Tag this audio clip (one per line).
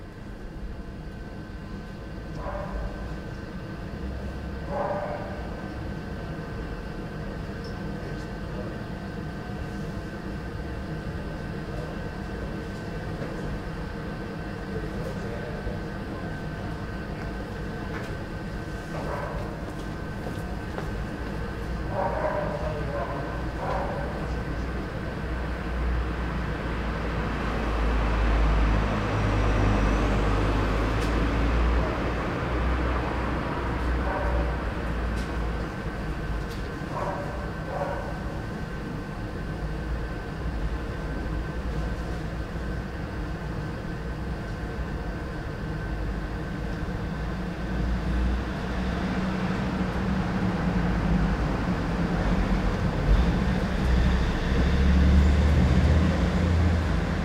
ambience
street